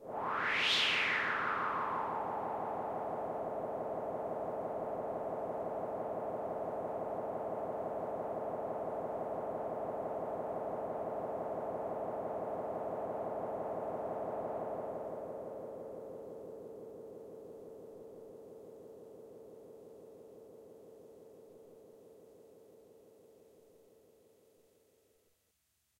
Preset Typhoon-Sound C
Casio HZ-600 sample preset 80s synth
synth, preset, Casio, 80s, HZ-600, sample